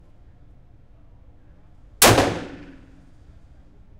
Smith & Wesson 686 Plus 4 Inch Barrel .357 Mag
Firing indoor at Nexus Shooting Range.
Revolver, Firearm, Firing, Weapon, Shooting, Gun